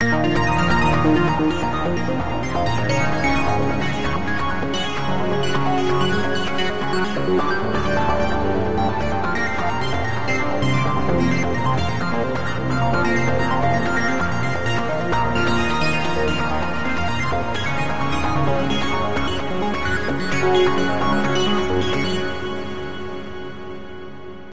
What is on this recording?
melody synth
I thought I'd contribute to this great site with this little melody I made by accident and have no use for.Reminds me of some old games due to the synth sound though the reverb gives it a more abstract/atmospheric feel.Hope you enjoy it!